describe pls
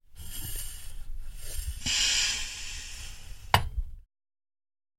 Bicycle Pump - Metal - Slow Release 06
A bicycle pump recorded with a Zoom H6 and a Beyerdynamic MC740.
Metal, Pressure, Gas